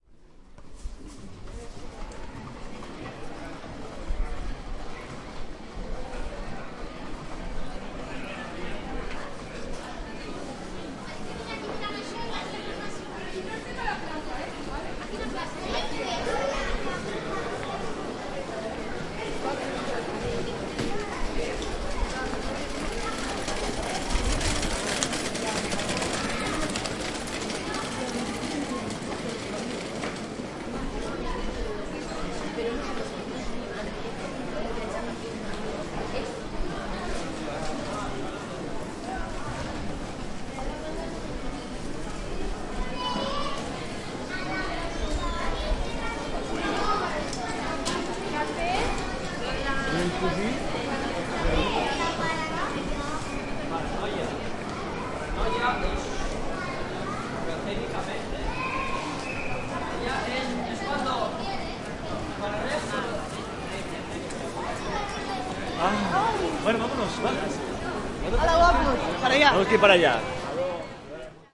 A walk down Pons i Gallarza street around midday. Recorded during the main festivities of Sant Andreu district, 30 nov 2013. Zoom H2.